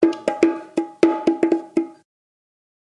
JV bongo loops for ya 1!
Recorded with various dynamic mic (mostly 421 and sm58 with no head basket)
bongo, congatronics, loops, samples, tribal, Unorthodox